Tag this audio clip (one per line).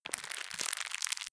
crackling
pop
pops
crackles
noise
crackle
popping
noises